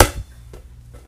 My first experimental attempt at creating impulse responses using a balloon and impact noises to create the initial impulse. Some are lofi and some are edited. I normalized them at less than 0db because I cringe when I see red on a digital meter... after reviewing the free impulse responses on the web I notice they all clip at 0db so you may want to normalize them. They were tested in SIR1 VST with various results. Various inflated plastic balls bounced on tile floor in furnished tiled bedroom.